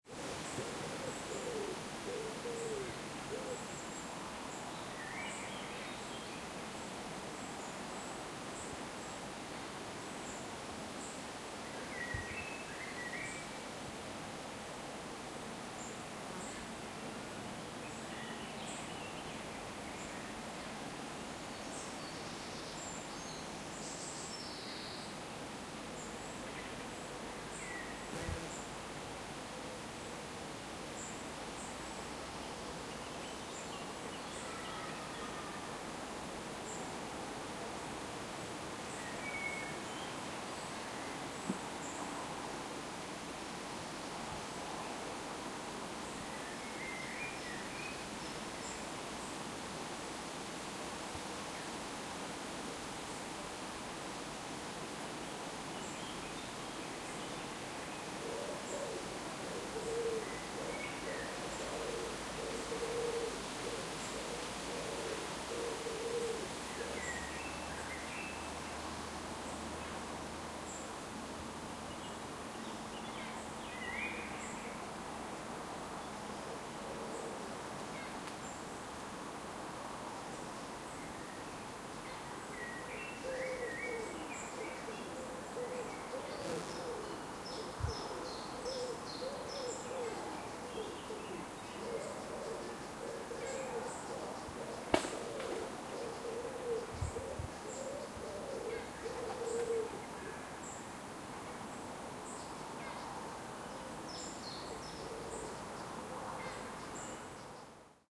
Woods ambience summer UK birds light wind through trees 4
Stereo recording of summer ambience within a wood close to houses and roads. Sounds of birdsong, wind in trees, distant activity and traffic.
UK, woods, birds, summer, distant-traffic, trees, light-wind, ambience, field-recording, wind, forest